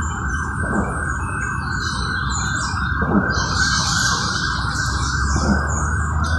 ambulancia1 SIBGA
Sonido de ambulancia, registrado en el Parque La Flora, Carrera 45 con Calle 56, Bucaramanga, Santander. Registro realizado como ejercicio dentro del proyecto SIAS de la Universidad Antonio Nariño.
ambulance siren
Ambulancia, Flora, Parque, Bucaramanga, La